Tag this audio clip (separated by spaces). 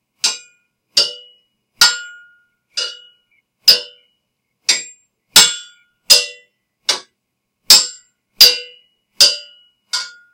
Battle Combat CryEngine Dagger Game Hits Medieval Ready Sword UDK Unity War Weapon